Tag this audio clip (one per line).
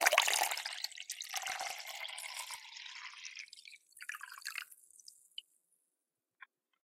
pour
pouring
sony-ic-recorder
water
liquid